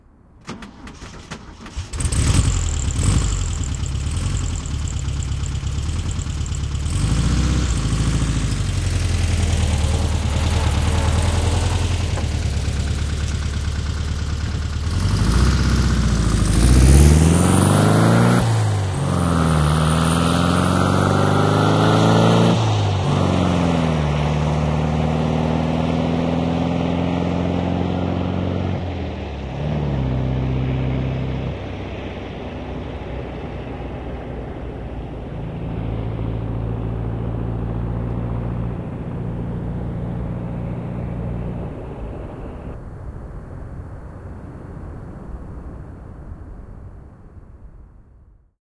Henkilöauto, lähtö asfaltilla / A car pulling away on asphalt, Volkswagen 1300, a 1967 model
Volkswagen 1300, vm 1967, kuplavolkkari. Käynnistys lähellä, lähtö ja etääntyy. (VW 1300, 36 hv).
Paikka/Place: Suomi / Finland / Vihti
Aika/Date: 01.11.1995
Auto, Autoilu, Autot, Cars, Field-Recording, Finland, Finnish-Broadcasting-Company, Motoring, Soundfx, Suomi, Tehosteet, Yle, Yleisradio